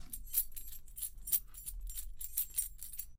campanilla puerta
little bell on the door to anounce a new customer
door, bell, ding